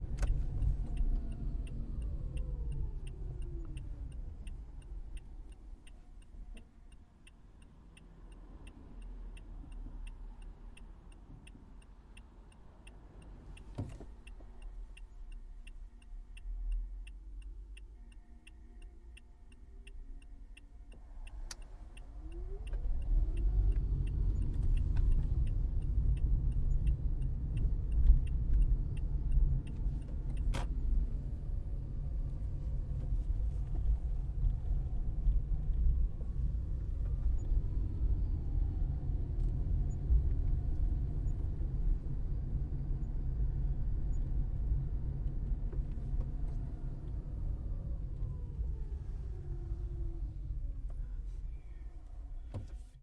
Interior Prius turn signal stop driving stop
Toyota Prius C (2015) driving on smooth pavement with turn signal with stops, start, turn and away. Good engine auto stop.
Prius, Hybrid, Toyota